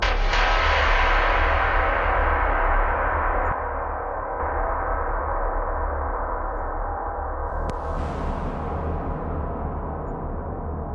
ambient 0004 1-Audio-Bunt 7
ambient, digital, DNB, glitch, harsh, lesson, lo-fi, noise, rekombinacje, synthesized, synth-percussion